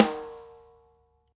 Snare sample - Chuzhbinov - Mahogony-OAK-bubinga 2
Snare_sample_-_Chuzhbinov_-_Mahogony-OAK-bubinga_2.